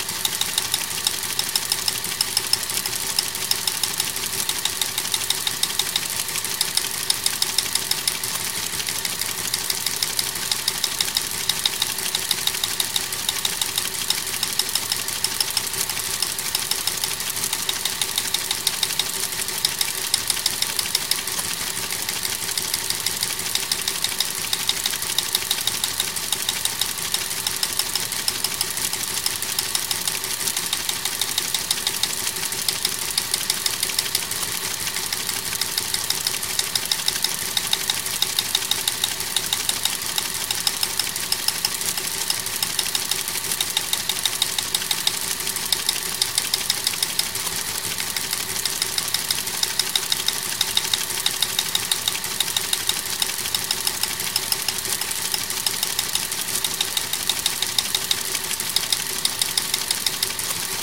This is the sound of a teletype I recorded at a moderate speed. There is no fade in or out since I wanted it easy to loop behind news voice overs.
I'm always eager to hear new creations!